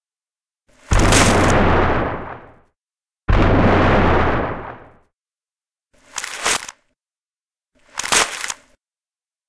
Truck crash with metal crunch. Created with explosion sound created from a pool splash and a can crunch (both sounds are included separately).
Recorded with Zoom H5 with XY capsule and pool sound captured with Oktava MC-012 onto the Zoom H5.
crash, explosion, accident, vehicle